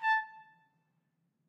One-shot from Versilian Studios Chamber Orchestra 2: Community Edition sampling project.
Instrument family: Brass
Instrument: Trumpet
Articulation: staccato
Note: A5
Midi note: 81
Midi velocity (center): 20
Room type: Large Auditorium
Microphone: 2x Rode NT1-A spaced pair, mixed close mics
Performer: Sam Hebert
a5, brass, midi-note-81, midi-velocity-20, multisample, single-note, staccato, trumpet, vsco-2